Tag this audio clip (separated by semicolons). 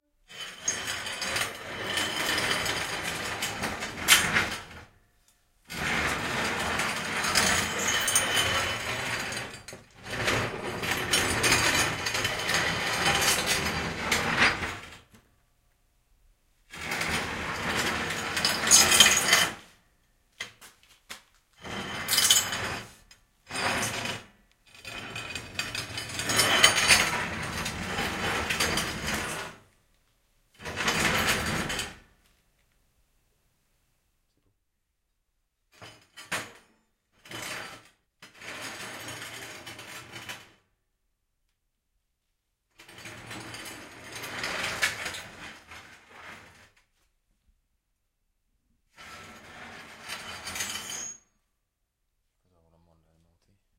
drags; heavy; metal